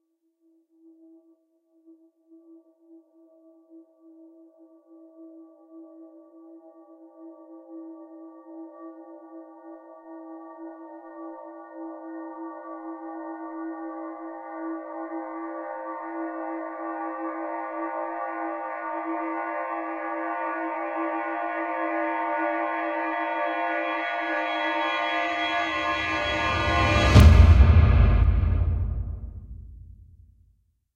Clang Cinematic Reversed With Deep Kick (2)
Compressed version of Clang Cinematic Reversed With Deep Kick , echo on the kick.
transition, climactic, cinematic